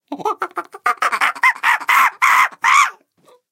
DJ Carl West giving us his best monkey impersonation. Captured with a Lawson L251 through Millennia Media Origin preamp.